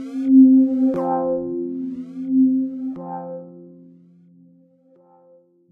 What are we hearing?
Good day. This short sound make by Synth1. Hope - you enjoy/helpful
sounddesign, gamesound, gameaudio, sound-design, sfx